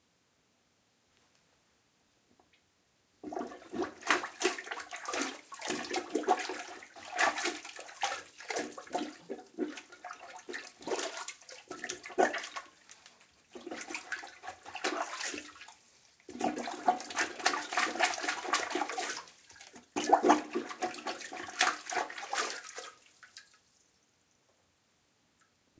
This recording was made by me on Monday, October 20th, at approximately 7:30pm. It was done in my house in Montgomery County, PA, with a Rode iXY mic (the 30 pin version), attached to an iPhone 4S. The iPhone, with the mic, was placed on the sink, approximately 6 to 8 inches away from the toilet. The sound was recorded using Rode's iPhone recording software, then uploaded via their file transfer site, downloaded, and then imported to Adobe Audition CS6 being run on a Mac Book pro, and normalized.
iPhone-4s, Plunger, Bathroom, Toilet, Rode-iXY